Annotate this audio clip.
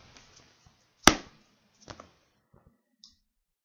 Punch Hit
This a great Brutal Fight sound effect by hitting a Canteloupe with a sponge......Really Hard!